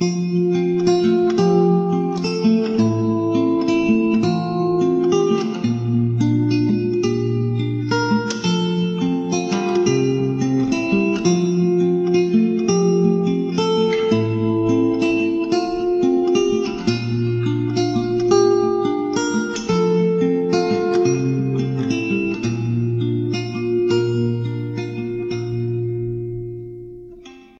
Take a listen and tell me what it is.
I created this loop with my guitar, 1 track with Reverb effect in Audacity
Take Care,